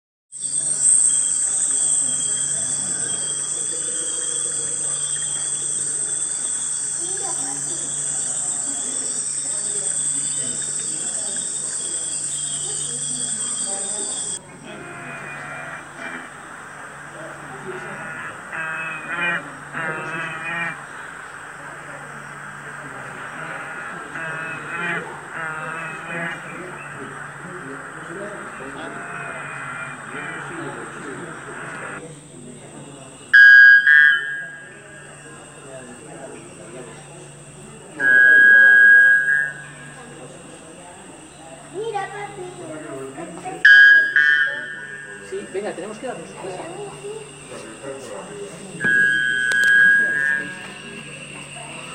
Tropical Bird Sounds 02
Taken during a field trip at the Natural History Museum in Barcelona, Spain (2019). A collection of sounds of different bird species, a few of them already extinct.